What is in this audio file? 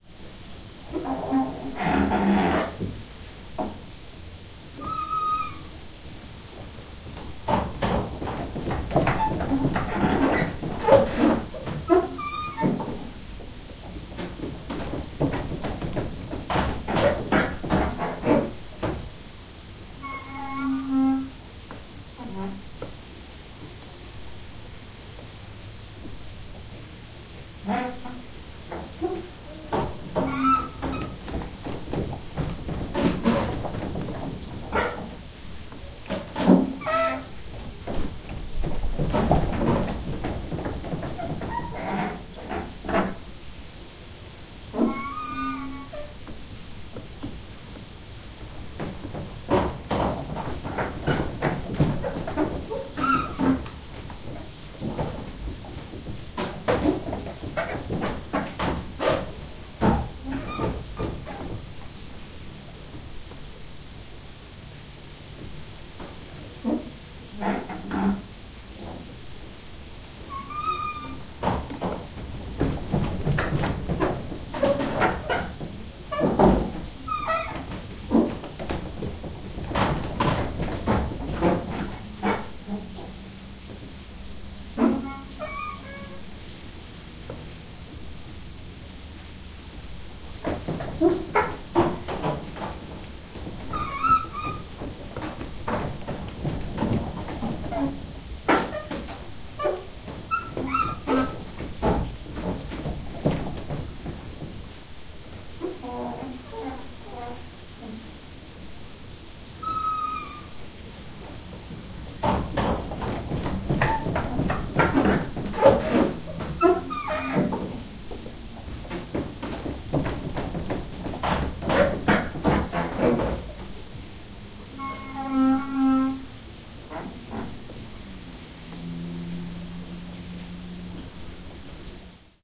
This is a recording of the workings of an 18th Century water-mill, in a preserved village called "Den Fynske Landsby" near Odense, Denmark. The stream driving the mill can be heard in the background.
creak, rumble